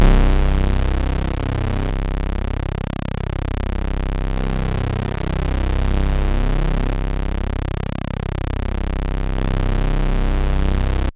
Soundsample from the Siel Opera 6 (Italy, 1982)
used for software samplers like halion, giga etc.
Sounds like the 8bit-tunes from C64
Note: C1
6, analog, analogue, c, c64, commodore, keyboard, opera, sample, samples, siel, synth, synthie